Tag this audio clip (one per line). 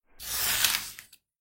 open
paper
soft
page
book